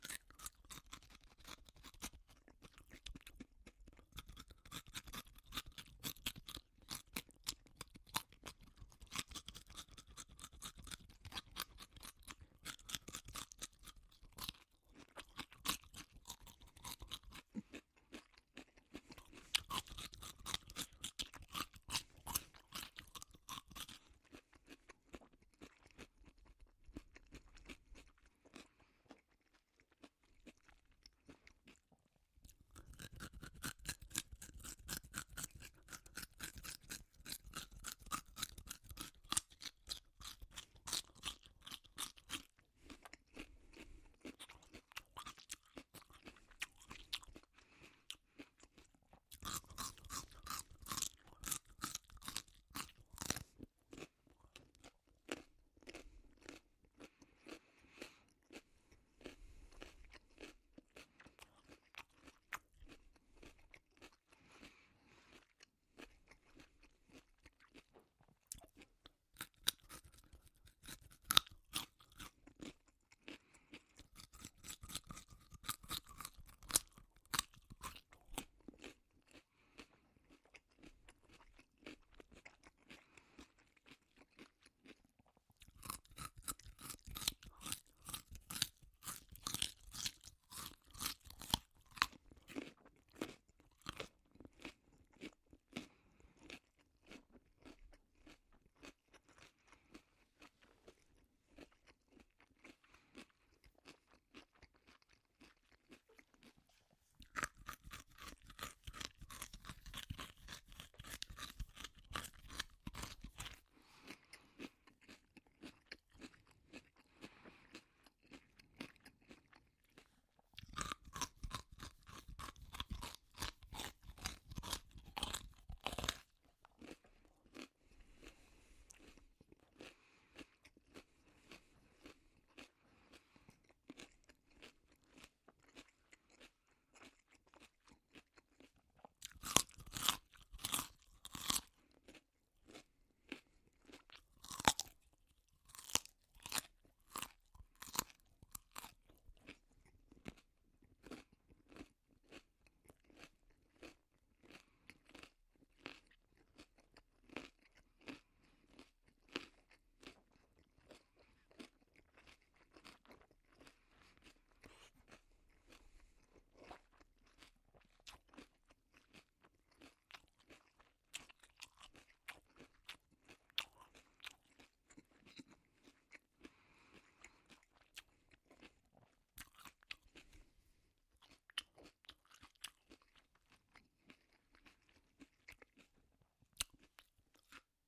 One eats a carrot in front of a microphone.